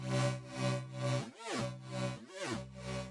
sound of my yamaha CS40M analogique
analogique, fx, sample, sound, synthesiser